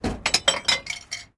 Glass Drop 2
Throwing away glass trash.